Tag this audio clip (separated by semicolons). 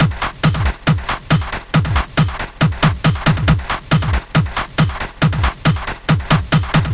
beat; club; dance; hard; hardcore; harder-dance